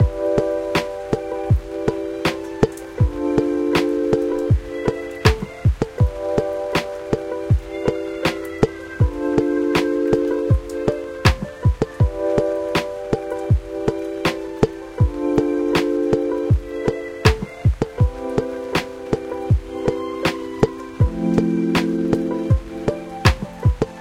Lofi sounding beat/loop.